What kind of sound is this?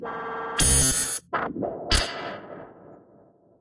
glich 0063 1-AudioBunt-1
NoizDumpster, breakcore, bunt, digital, drill, electronic, glitch, harsh, lesson, lo-fi, noise, rekombinacje, square-wave, synth-percussion, synthesized, tracker